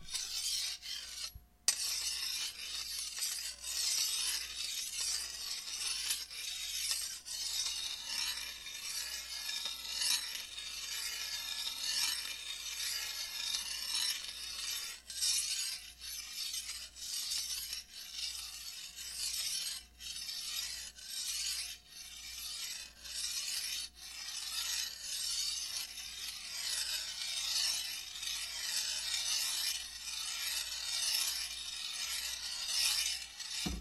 Metal Grinding-Sharpening
This sound can be used as the sound a blade being sharpened on a wet rock or some sort of grinding machine
grinding, sharpening